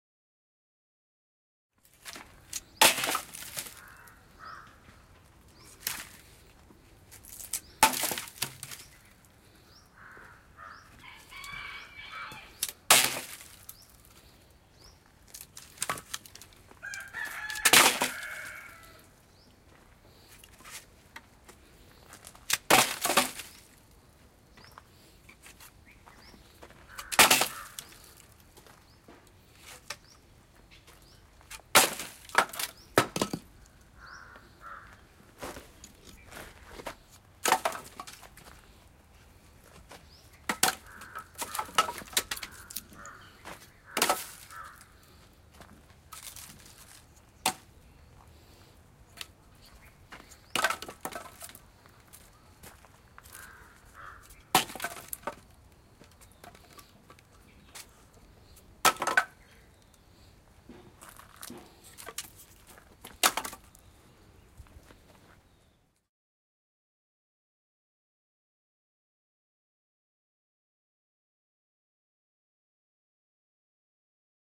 Stacking Wood - light wood.